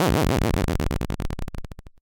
Simple retro video game sound effects created using the amazing, free ChipTone tool.
For this pack I selected the LOSE generator as a starting point.
It's always nice to hear back from you.
What projects did you use these sounds for?
deadly, computer, eightbit, video, again, loser, hit, death, damage, failure, classic, lose, arcade, start, hurt, problem, loose, game, end, chip, raw, over, electronic, retro, finish, fail, 8-bit